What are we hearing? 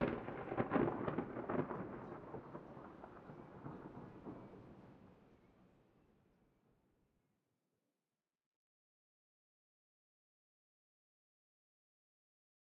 balfron thunder C
Field-recording Thunder London England.
21st floor of balfron tower easter 2011
England, London, Field-recording, Thunder